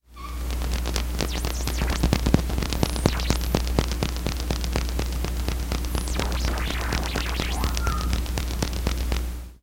Nail rubbing FrotandoUnas
frotado de uñas / rubbing nails
grain, granulated, hands, nails, rub, rubbing